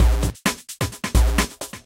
Just a Misc Beat for anything you feel like using it for, please check out my "Misc Beat Pack" for more beats.